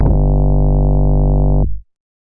100 Monster 808 Sauce - Alien 808
808 monster kick bass